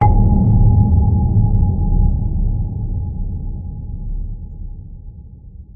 submarine sonar
fx, hit, hits, see, sonar, submarine